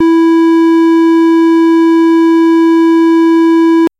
LR35902 Square E5
A sound which reminded me a lot of the GameBoy. I've named it after the GB's CPU - the Sharp LR35902 - which also handled the GB's audio. This is the note E of octave 5. (Created with AudioSauna.)
chiptune, fuzzy, square, synth